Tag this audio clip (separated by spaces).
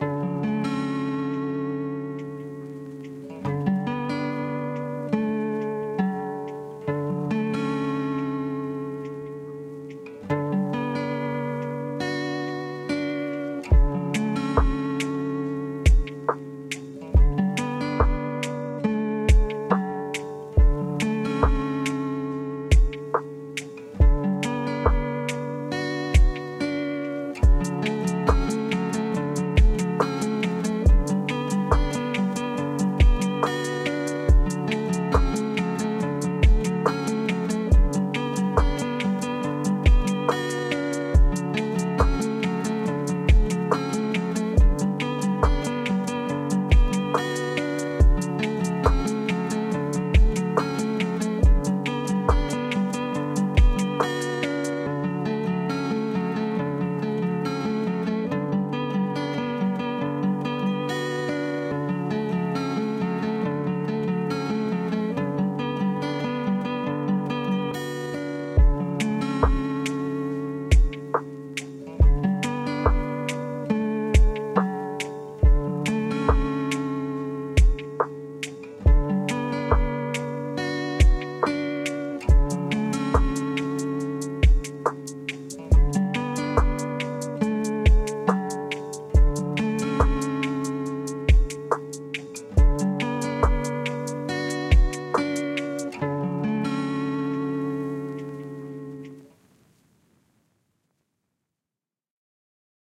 beats loops percs music acoustic lofi guitar lo-fi loop drum-loop drum groovy song